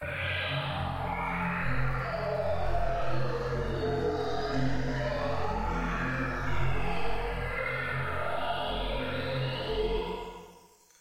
Digital Texture 10
Experimental digital texture,,, mm get somma that in ya
Josh Goulding, Experimental sound effects from melbourne australia.
fi, sci, abstract, techno